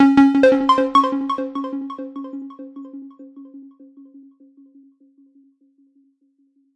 explosion beep kick game gamesound click levelUp adventure bleep sfx application startup clicks
game, bleep, explosion, levelUp, click, gamesound, adventure, beep, startup, application, clicks, kick, sfx